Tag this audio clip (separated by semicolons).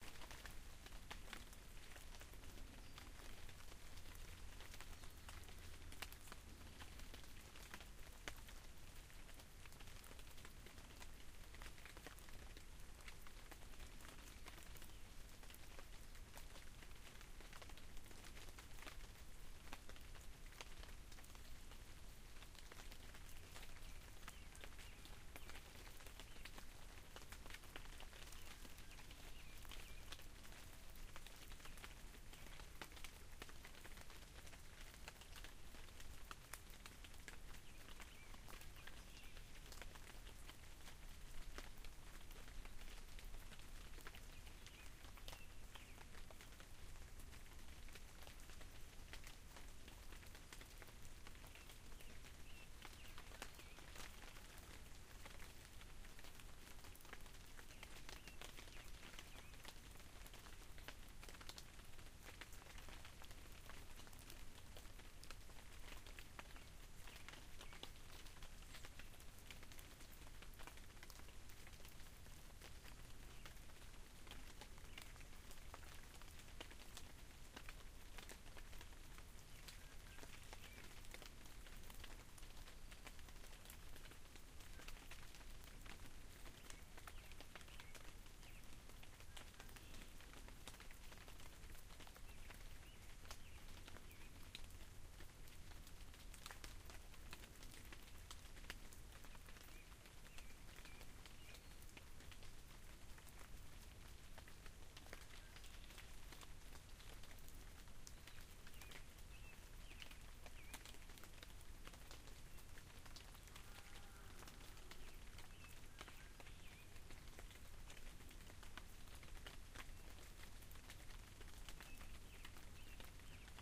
ambiance; birds; field-recording; rain; unedited; west-virginia